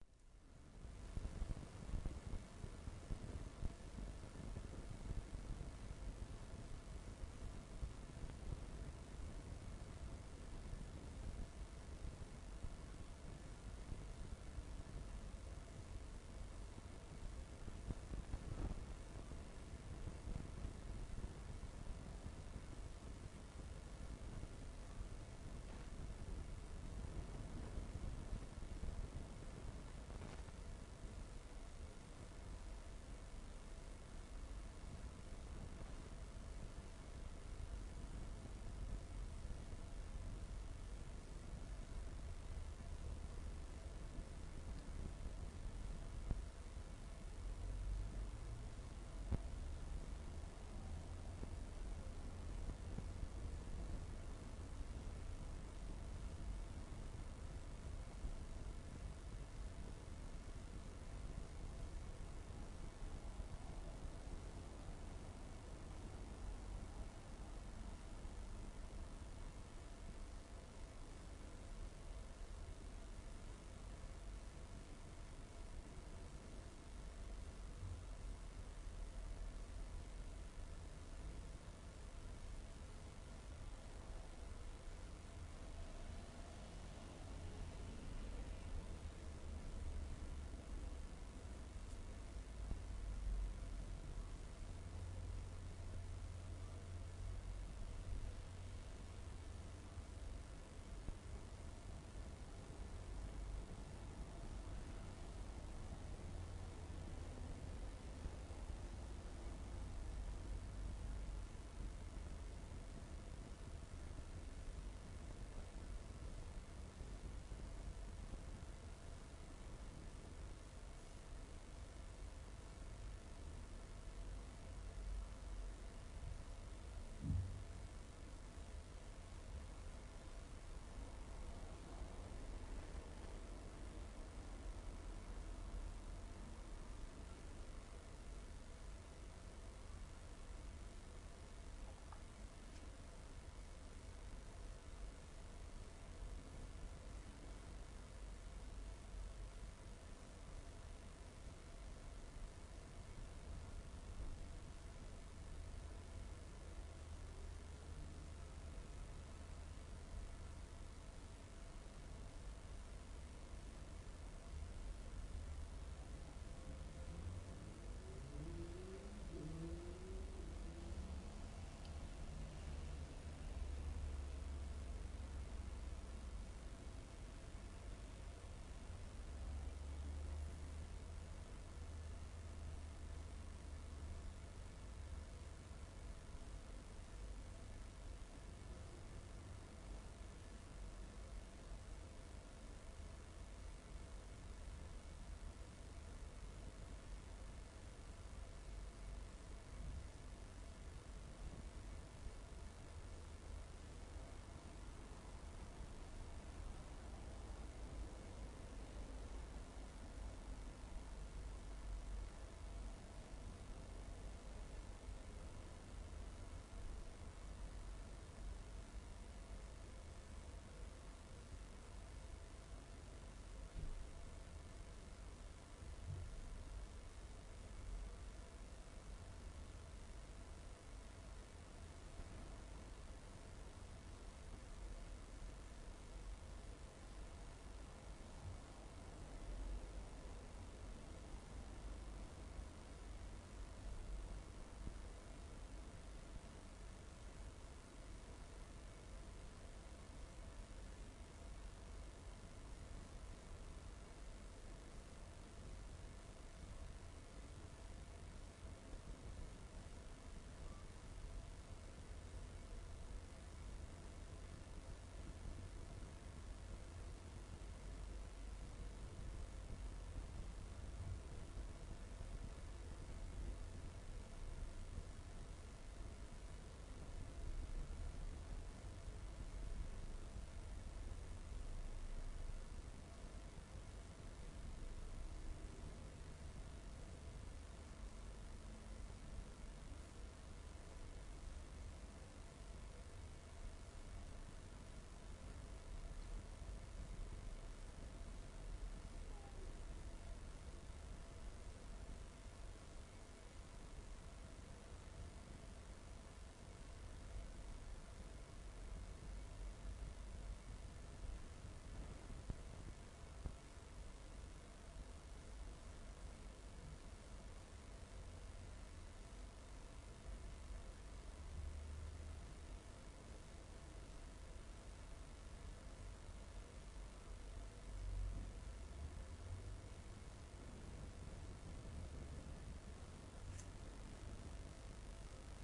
ECU-(A-XX)171 phase1
Engine Control Unit UTV ATV Trail Path Channel Wideband Broadband Battery Jitter MCV Dual Carb Rack Shelf SOx COx NOx Atmospheric Reluctor IsoSynchronous Fraser Lens Beam Mirror Field T2 T1xorT2